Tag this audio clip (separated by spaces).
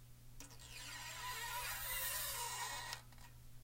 MTC500-M002-s14 coin bass guitar